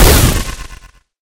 A synthesized laser shot sound to be used in sci-fi games. Useful for all kind of futuristic high tech weapons.
gamedev, laser, lazer, photon-cannon, futuristic, science-fiction, videogames, indiedev, games, video-game, indiegamedev, sci-fi, high-tech, spacegun, game, gamedeveloping, gaming, sfx